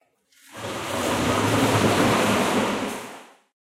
Garbage Can Dragged on Tile
Large plastic garbage can being dragged across tile in a public bathroom.